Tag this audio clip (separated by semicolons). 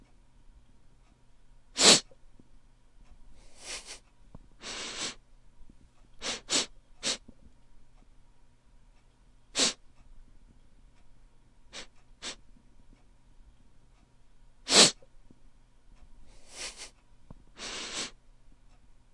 Sniffing; sickness; ill; disgust; sick; cold; quite; Sounds; human; health; Various; nose; loud; flu; blowing